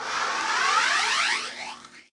Guillotine blade open and close
blade, paper